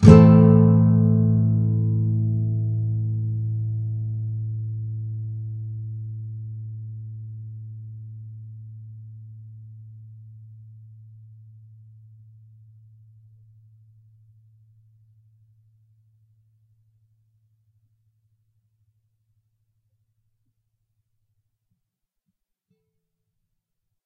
Amin bar
Standard open A minor Bar chord (E minor formation). Down strum. If any of these samples have any errors or faults, please tell me.
chords, acoustic, guitar, bar-chords, nylon-guitar